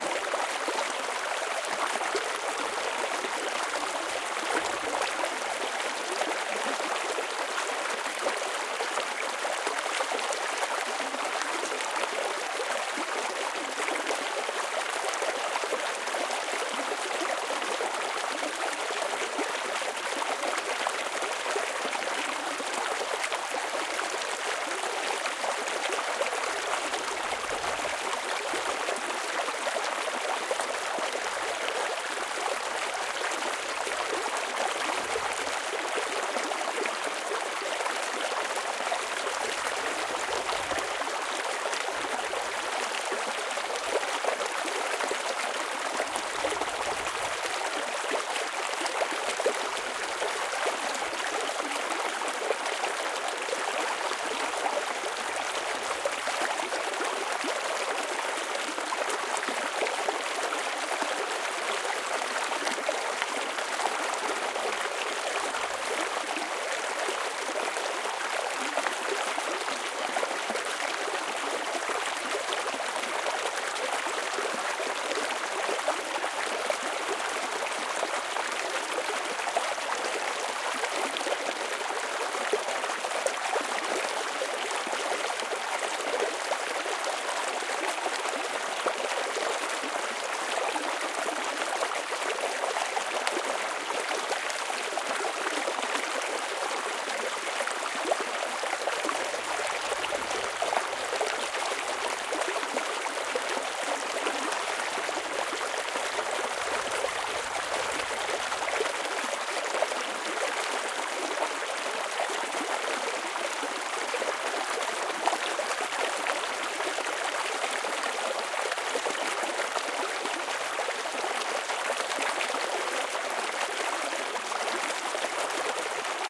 A calm mountain stream flowing over rocks.
Recorded with: Zoom H6, XY Microphone.
river, water, mountain-stream, stream, flowing-water, OWI, field-recording, ambiance, stereo